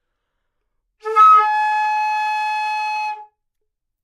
Flute - Gsharp5 - bad-attack
Part of the Good-sounds dataset of monophonic instrumental sounds.
instrument::flute
note::Gsharp
octave::5
midi note::68
good-sounds-id::3079
Intentionally played as an example of bad-attack